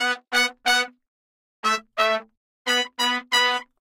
Modern Roots Reggae 14 090 Bmin A Samples